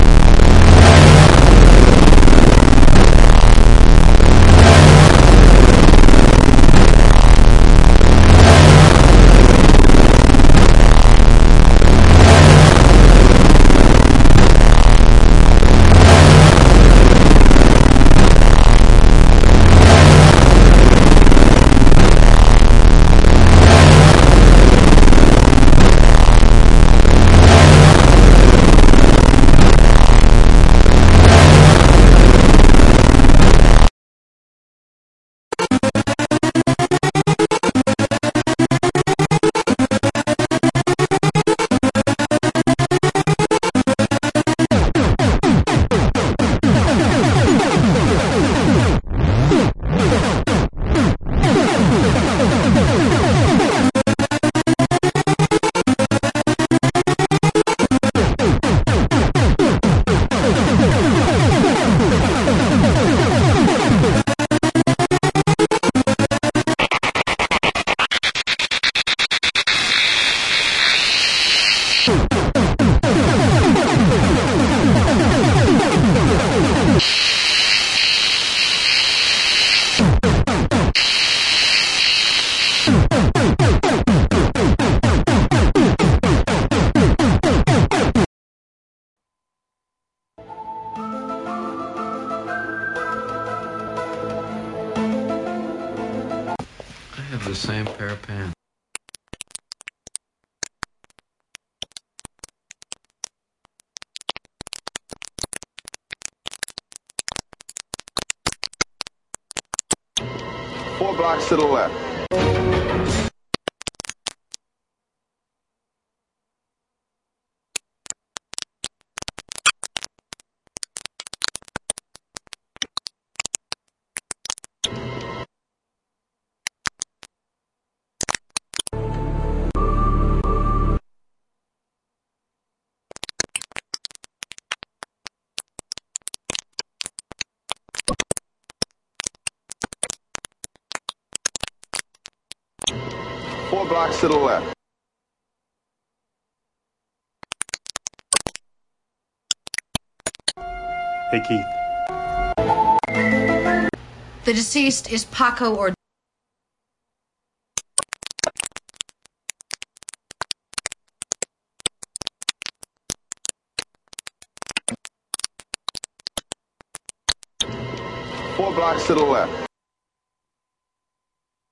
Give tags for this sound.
alien computer damage destroy digital error experimental file futuristic glitch harsh laboratory noise noise-channel noise-modulation sci-fi signal sound-design x-files

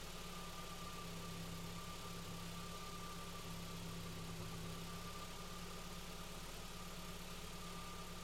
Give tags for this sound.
benz car dynamometer dyno engine mercedes vehicle vroom

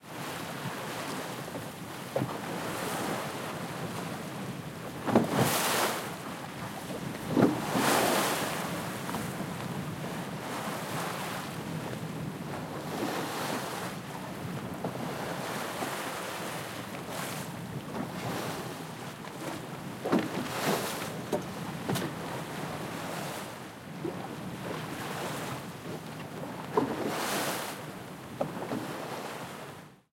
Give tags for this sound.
ambience boat field-recording sea waves

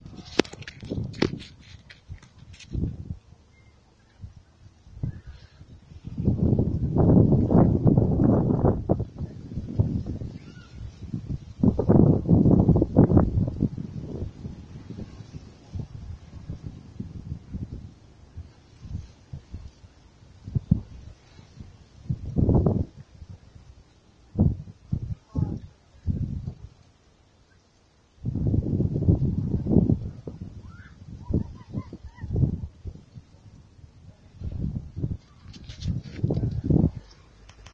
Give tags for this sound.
Open OWI

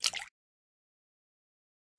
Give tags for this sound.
crash Slap Dripping Movie Running blop Water marine aqua bloop wave pouring Sea Wet Splash Game Drip Lake aquatic pour Run River

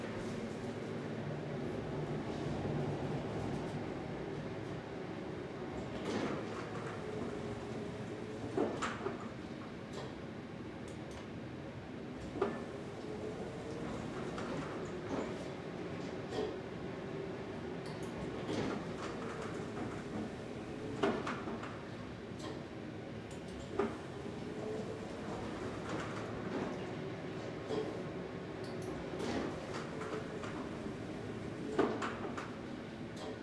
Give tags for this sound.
door elevador open

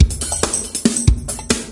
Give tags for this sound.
electronic beat

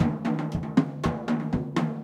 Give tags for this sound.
drum loop

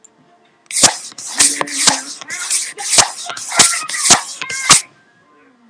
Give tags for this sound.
noise strange weird

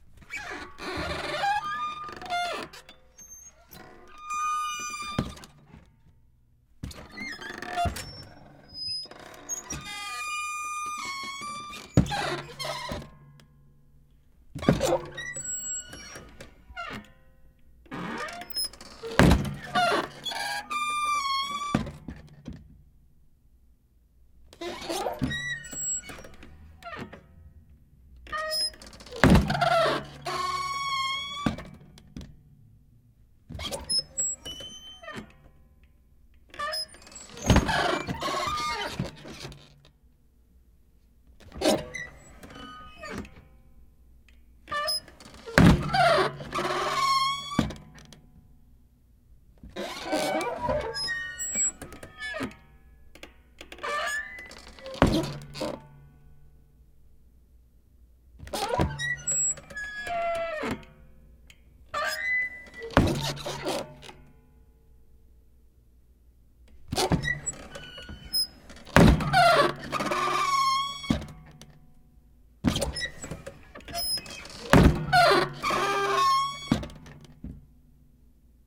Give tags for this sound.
creak door swinging plastic heavy squeak room store back flaps

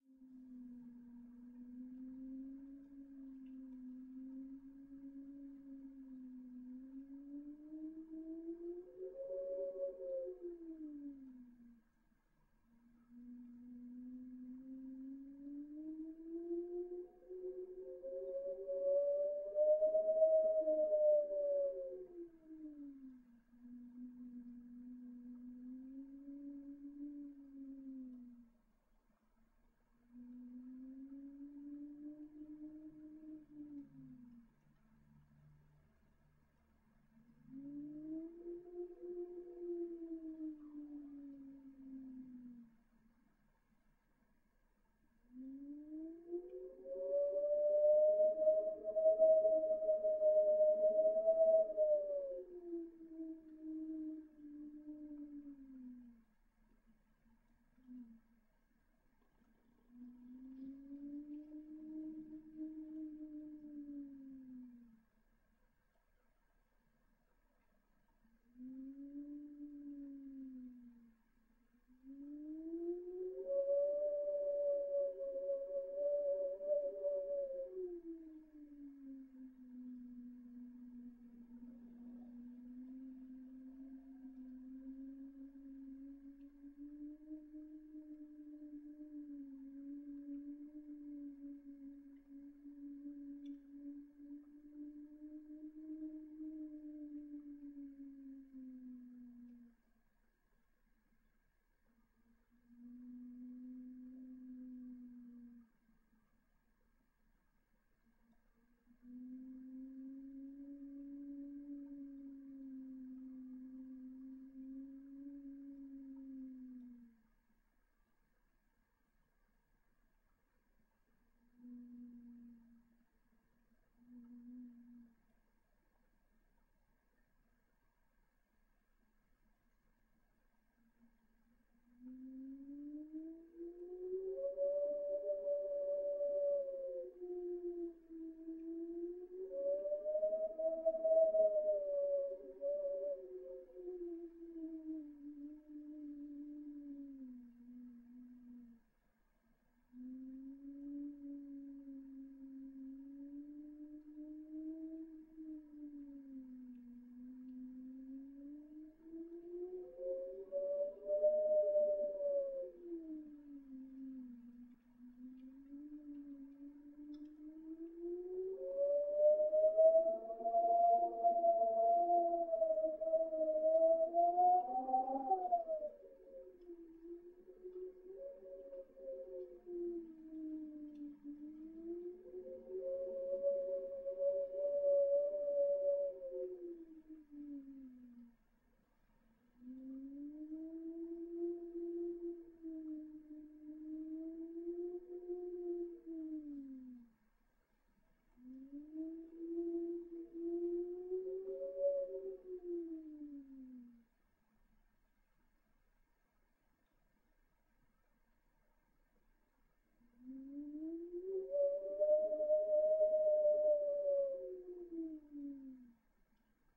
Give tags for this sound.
howl wind window

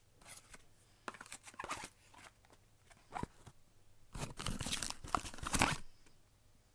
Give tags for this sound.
smoke cigarette pack smoking

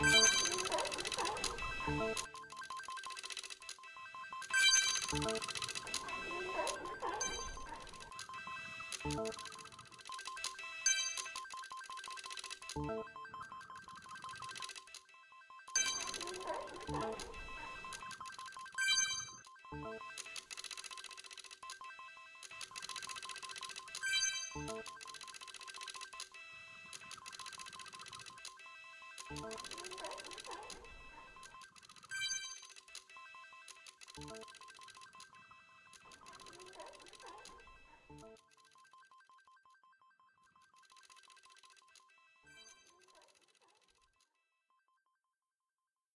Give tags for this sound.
ok thats seals coins

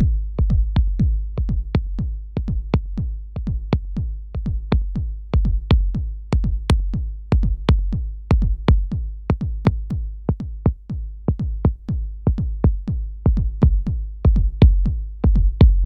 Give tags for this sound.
dance groovy house kick

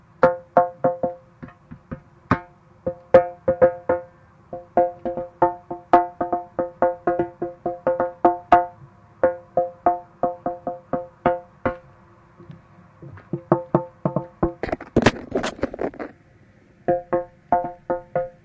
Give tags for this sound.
field-recording hydrophone percussion tire

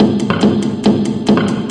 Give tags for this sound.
beat drum drum-loop drums idm loop percs percussion-loop quantized

